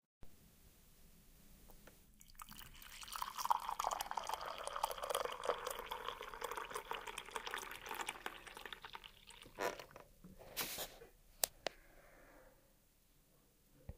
Serve Coffee
Serving coffee in a cup of ceramic
Coffe, Field, Recording, Serve